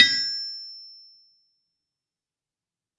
Anvil - Lokomo A 100 kg - Hammer on back 1 time and bright
Lokomo A 100 kg anvil tapped on the back once with a hammer and it sounds bright.